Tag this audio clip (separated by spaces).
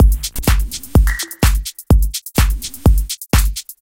beat cubase dance drum drum-loop electro Ganso groovy loop minimal Mr percs percussion-loop techno